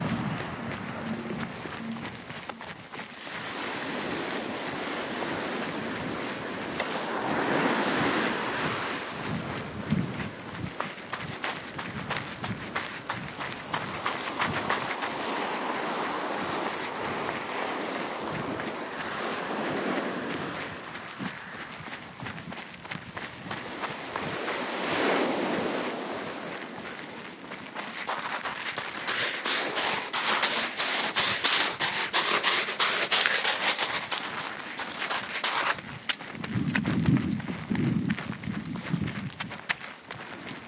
Jogging on a stony beach near the waves. Stereo recording on Zoom H1. A little wind noise in places.
stones, shore, seaside, beach, waves, jogging